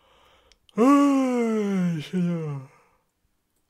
Yawn exclaiming (in Spanish): "ay, señor..."
I was going to record something, but that happened. I was going to not upload this one, because I perceive myself more dumb than yawning... But it may be useful for someone 🤷‍♂️ Comment if that's your case
bored, sleep, sleepy, tired, yawn